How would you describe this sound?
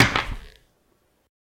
0014 Bag Drop
Recordings of the Alexander Wang luxury handbag called the Rocco. Bag drop
Alexander-Wang, Handbag, Hardware, Leather